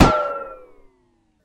Ricochet metal5
bang, crack, gun, metal, ping, pow, ricochet, shoot, snap, ting, wood